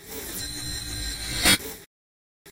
A synthesized, digital oneshot - whirring into a hit.